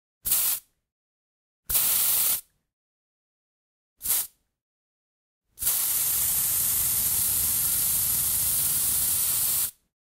Household - Aerosol -Can - Spray
Close up recording of multiple deodorant sprays
Aerosol, air, burst, can, deodorant, spray, spraycan